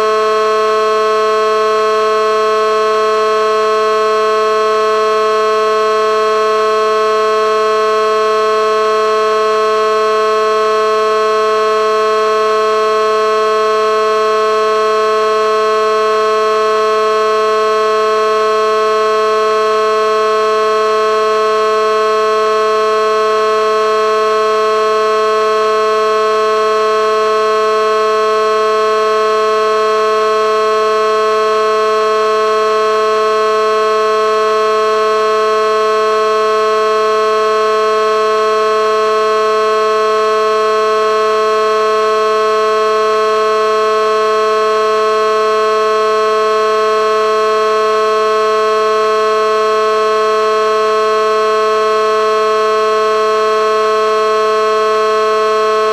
Whelen Horn
Electronic air horn sound miked directly from the Whelen siren box
police, siren